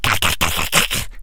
A voice sound effect useful for smaller, mostly evil, creatures in all kind of games.
Voices, arcade, goblin, Speak, kobold, gamedeveloping, indiegamedev, sfx, RPG, voice, videogames, gaming, indiedev, imp, fantasy, Talk, minion, small-creature, game, gamedev, vocal, videogame, games, creature